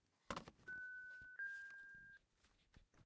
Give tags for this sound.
beep; beeping; telephone

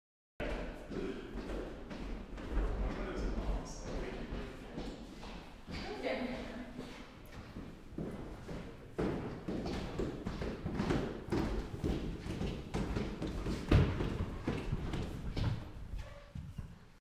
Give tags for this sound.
footsteps; friends; group; stairs; steps; walk; walking

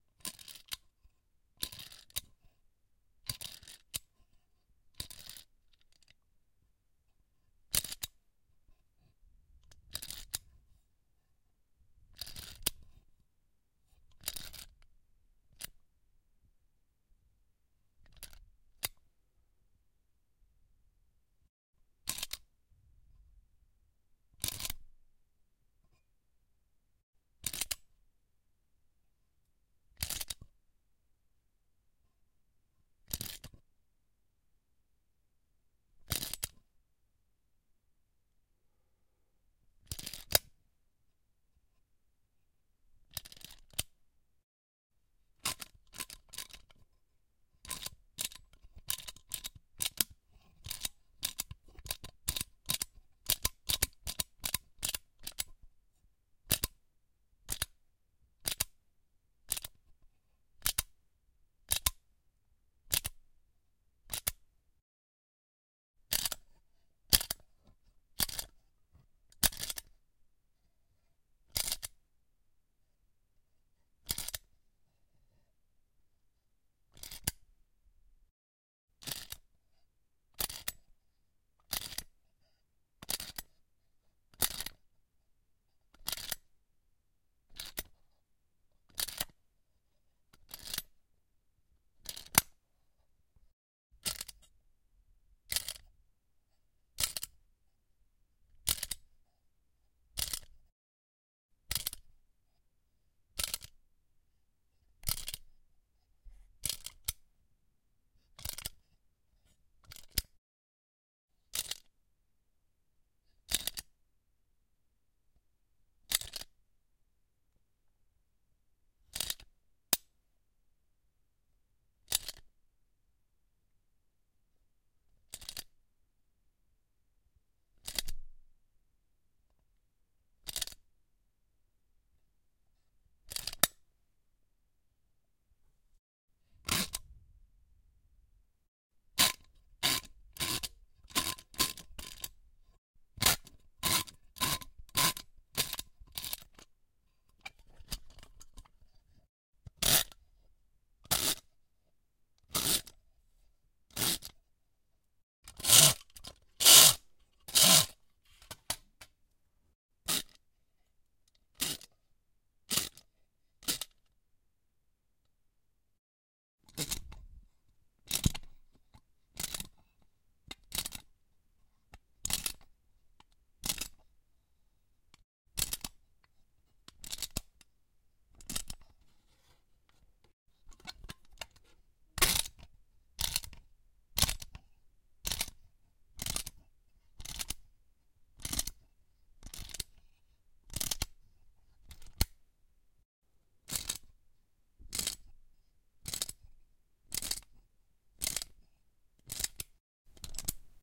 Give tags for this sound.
metal; metallic; spring; tin; toy

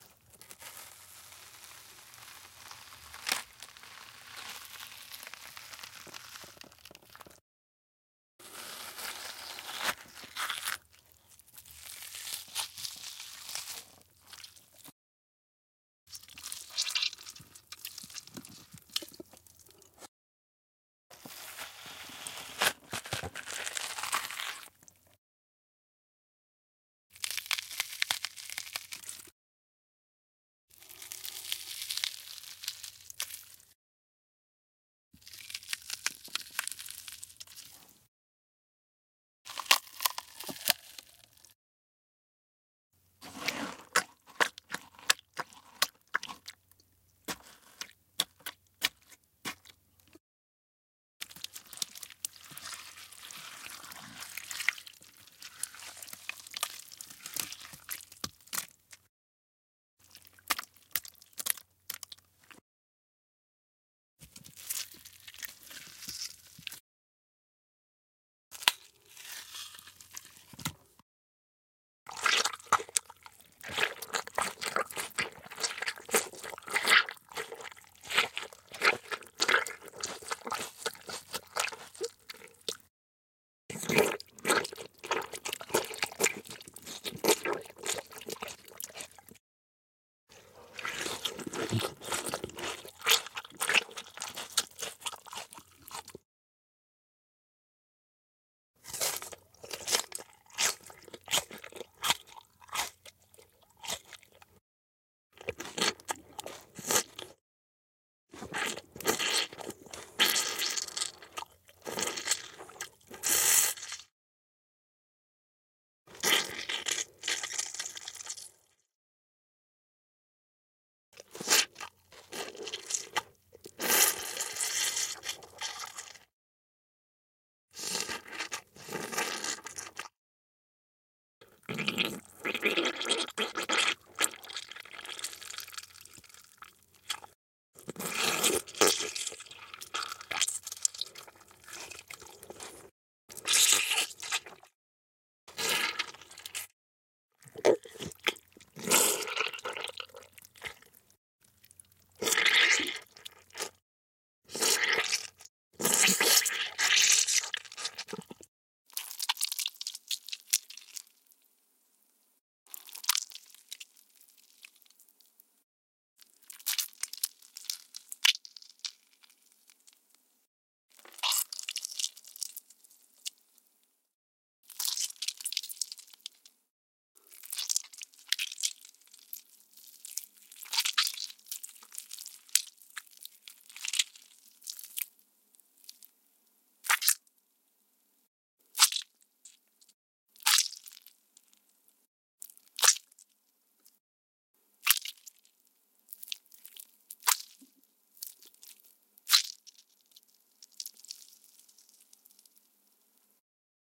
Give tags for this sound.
squish; squelch; flesh; splat; gory; splatter; blood; wet; slime; gore; gross

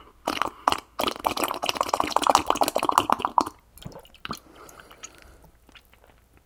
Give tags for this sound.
water; floop; slurp

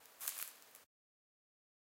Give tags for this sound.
game; sfx; straw

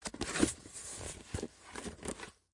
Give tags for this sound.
moving; cardboard; foley; scooting; paper; box; handling

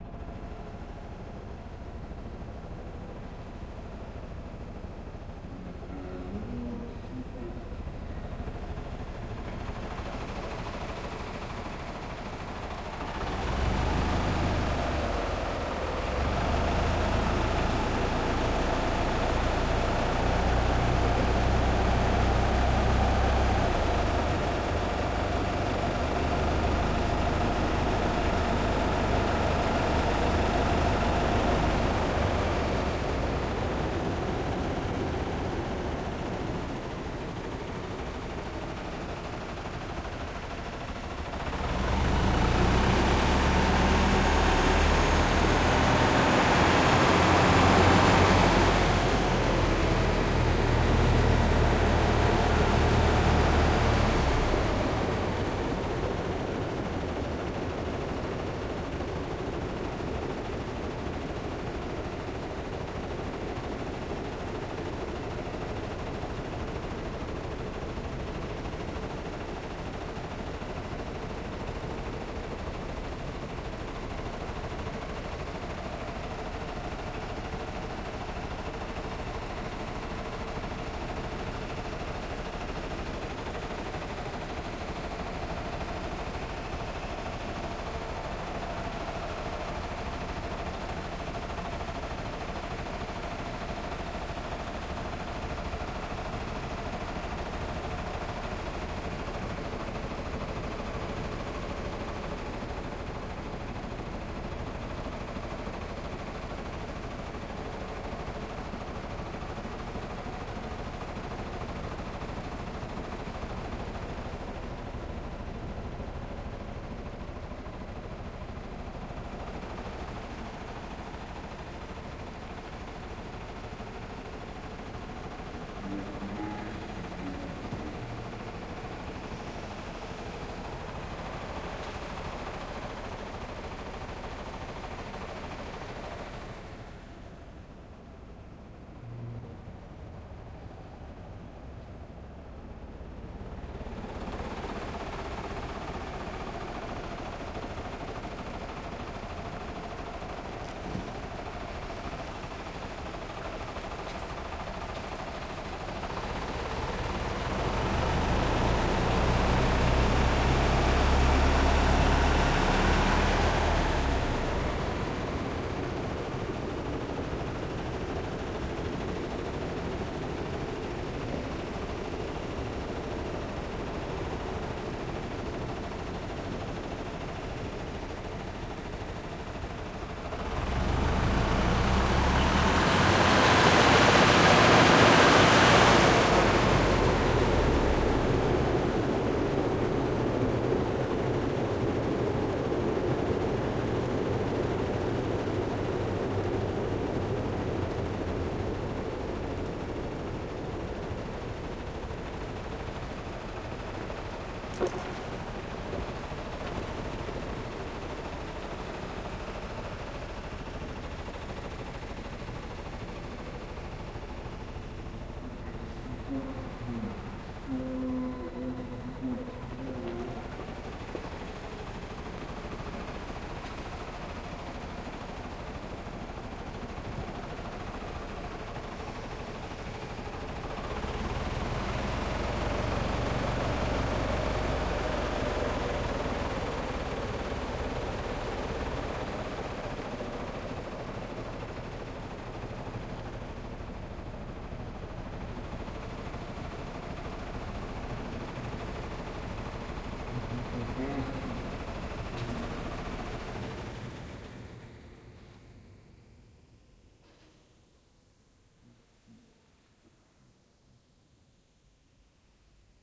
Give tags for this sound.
SFX; slomo

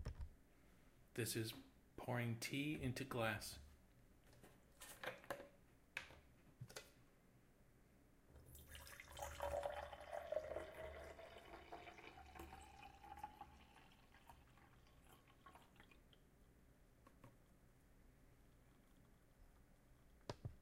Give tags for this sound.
dinner; glass; foley; AudioDramaHub; pouring; tea; kitchen; liquid

